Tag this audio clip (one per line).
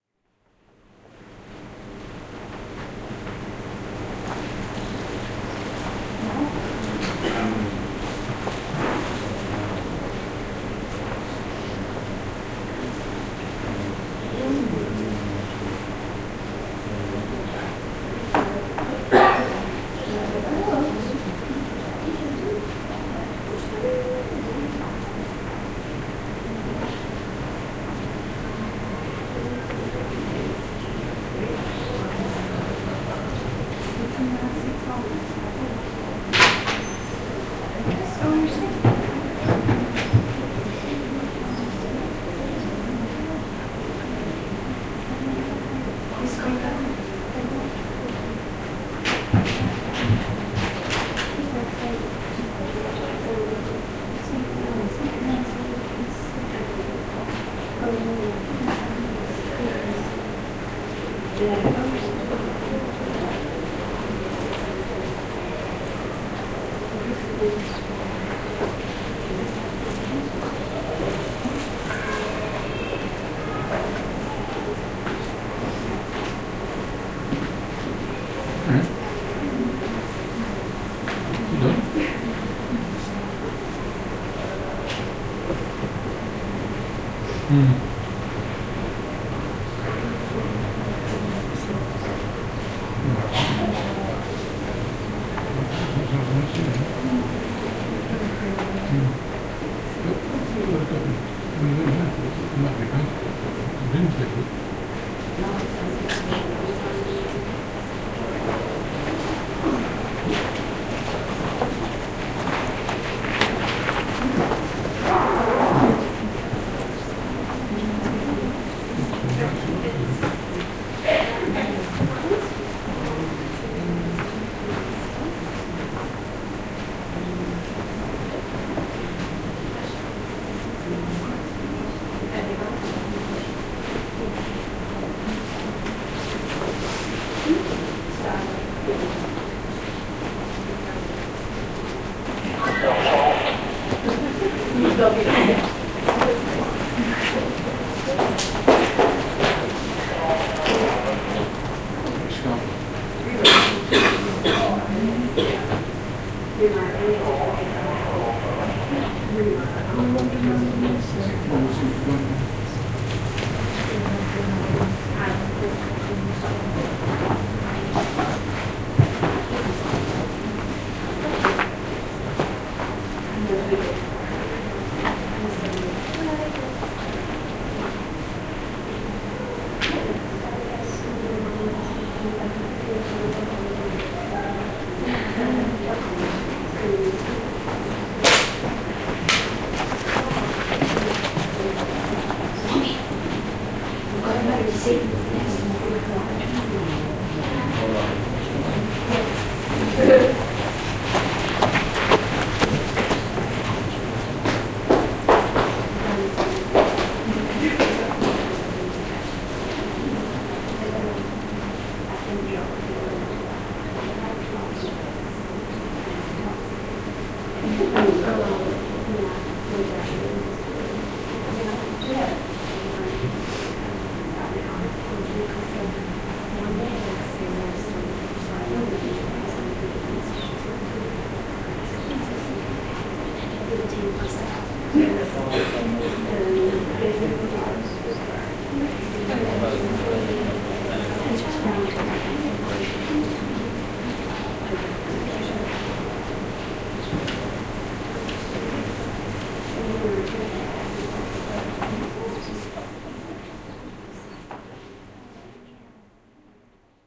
atmosphere
field-recording